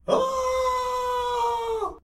The sound of an old lady screaming.
Foley, Scream